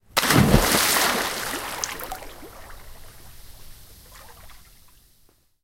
Splash, Jumping, B
Raw audio of someone jumping into a swimming pool.
An example of how you might credit is by putting this in the description/credits:
The sound was recorded using a "H1 Zoom recorder" on 28th July 2016.
jump, jumping, pool